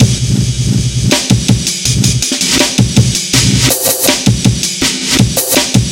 A rolling Breakbeat 162bpm. programed using Reason 3.0 and Cut using Recycle 2.1.
162bpm, amen, beat, break, dnb, jungle, loop